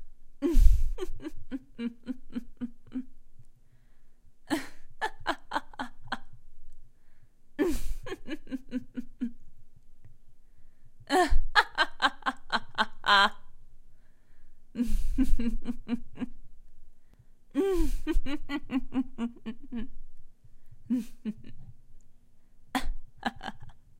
Woman Evil Laughing
girl, creepy, multiple, laughing, evil, maniacal